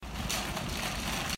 It's a shopping cart on a gravel lot, but the pitch reminds me of some kind of old machine running or getting going.
grinding-gear, gears, grinding, shopping-cart